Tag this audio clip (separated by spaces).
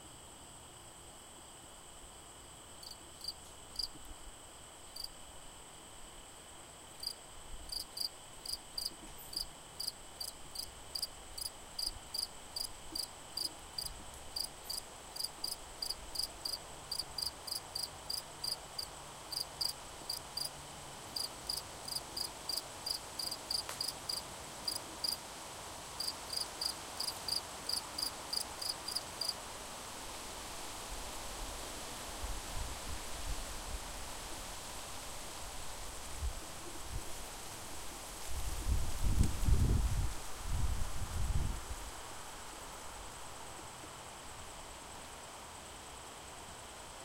nature insect cricket animal